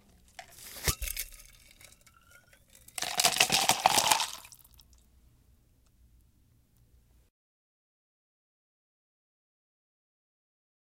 coqueteleira com liquido
Nessa gravação, foi utilizada microfone condensador de cápsula larga e o material utilizado foi uma coqueteleira com água e gelo sendo despejado dentro de um copo de vidro.
Gravado para a disciplina de Captação e Edição de Áudio do curso Rádio, TV e Internet, Universidade Anhembi Morumbi. São Paulo-SP. Brasil.
coqueteleira, gelo, ice, liquid, liquido, water